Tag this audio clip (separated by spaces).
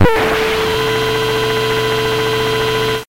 beep bleep computer lo-fi NoizDumpster TheLowerRhythm TLR VST